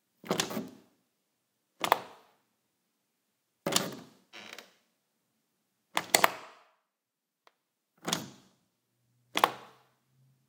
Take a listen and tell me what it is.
Turning my doorknob into the door latch